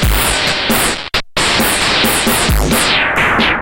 This is an old Boss drum machine going through a Nord Modular patch. Two bars at 133BPM.